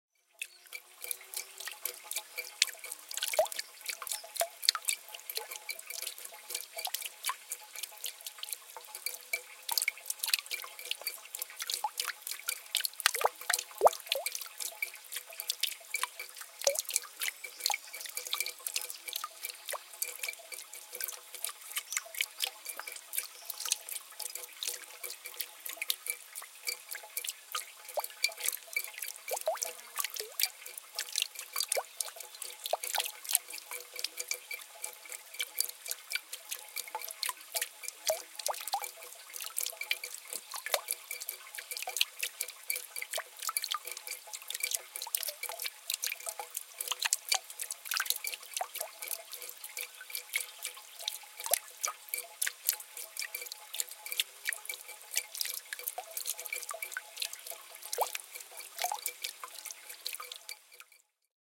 Medium Speed, Irregular Dropping Water
Single Small Fountain recording, with Zoom H4